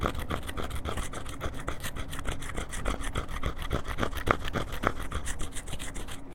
Scratching on metal piping, varying speeds
industrial, metal, pipe, scratch, scratching, sticks